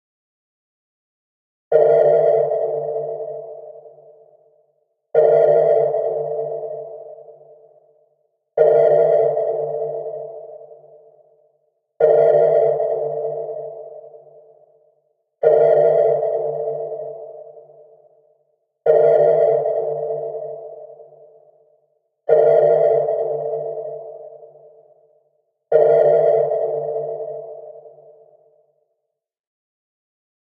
sonar submarine radar deepsea hydrogen skyline com
Quicker sonar pings with a shorter decay.
Made in FL Studio 10
aquatic, deep-sea, depth, navy, ping, sea, sonar, sub, submarine, underwater